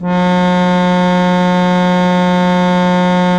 single notes from the cheap plastic wind organ